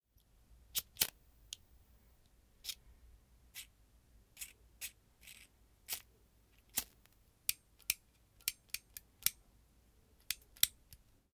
Playing around with cigarette lighter (BIC).
This is a recoring from foley session.